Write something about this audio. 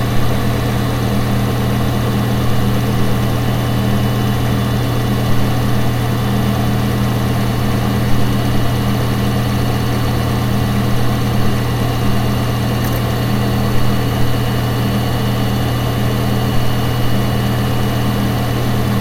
The sound of one of my computers fans and stuff.